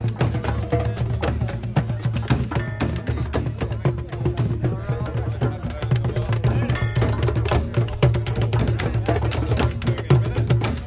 Trash drumming at Sark Roots Festival 2016 (pt1)
Trash drumming at Sark Roots Permaculture Festival 2016.
Recording of a set of interesting recycled objects mounted on scaffolding in the middle of the festival site. Recorded whilst festival was in full swing around the wildly improvising (mostly) amateur drummers on Saturday night
Recorded with a Tascam DR-40 portable recorder. Processing: EQ, C6 multi-band compression and L3 multi-band limiting.
Drumming, Festival, Group, Roots, Sark, Trash